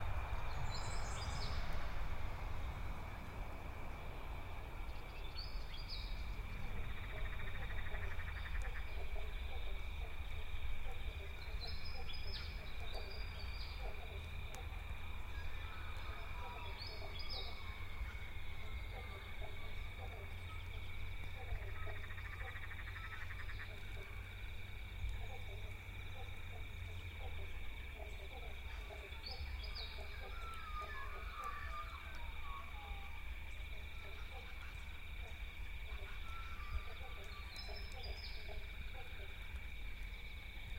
recording with inbuilt condenser mics on zoom h4n
distant traffic, wind noises not filtered out, frogs birds , central victoria, australia
sound, field, recording, birds, ambient, frogs, location